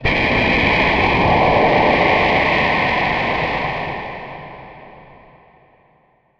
A remix of daveincamas' AirHoseDisconnect. I pitched the sound way down and added some reverb.
air, depressurization, hose